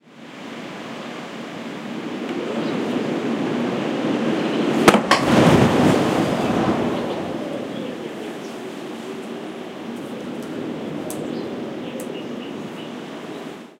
20160416 wind.gust.door.02
Gusty wind knocks at door. Warning: birds singing outside can also be heard. Audiotechnica BP4025, Shure FP24 preamp, PCM-M10 recorder. Recorded near La Macera (Valencia de Alcantara, Caceres, Spain)